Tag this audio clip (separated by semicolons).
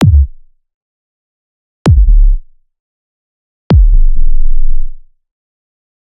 kick; C5; bass